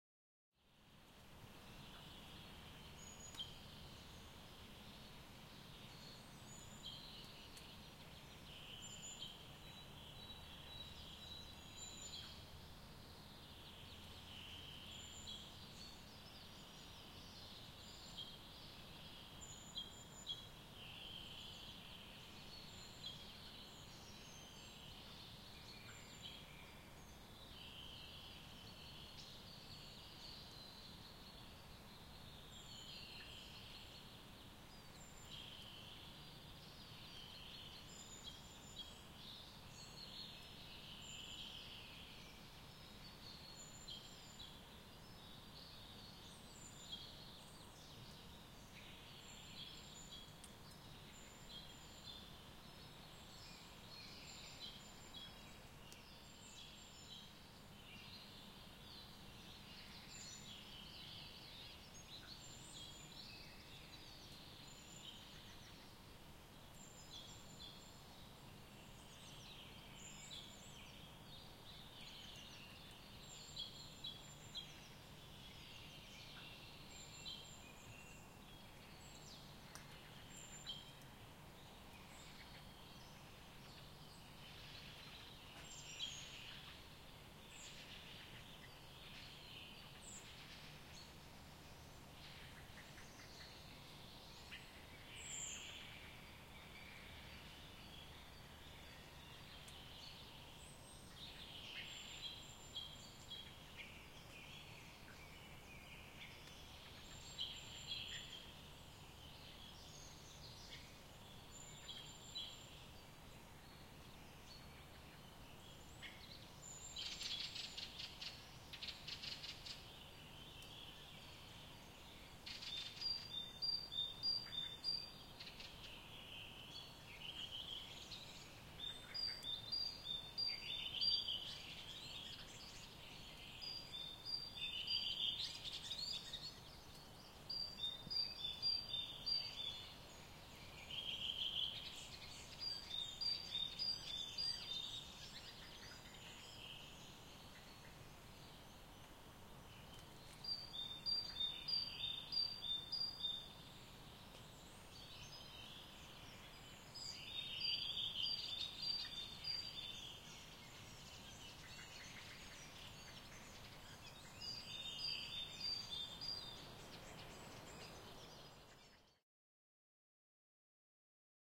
forestbirds may morning
Birdsong at 6.30 AM, wet forest, some slight suburbia background hum.